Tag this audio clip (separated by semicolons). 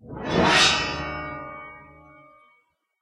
cymbal; percussion; transformation